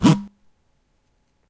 This is from a library of sounds I call "PET Sounds", after the plastic material PET that's mainly used for water bottles. This library contains various sounds/loops created by using waste plastic in an attempt to give this noxious material at least some useful purpose by acoustically "upcycling" it.
ecology, waste, plastic